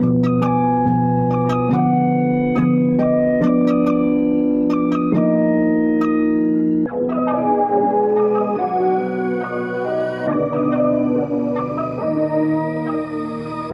Trap Melody
lil yachty, migos, lil uzi type samples
Comment your finished beats with a link to it.
Hip, hop, Instrumental, Lo-Fi, Rap, Sample, Trap